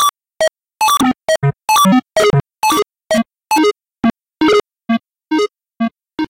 1sujkowski cim2010
In this song, i've began with some sound generated with the software audacity with a square signal that i've sliced into several parts. Next, I have changed the scale for some of them in order to get a sound like an 8-bit music (chiptune).
8bit, chiptune